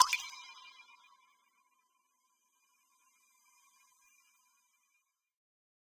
A pitched up drip processed with convolution reverb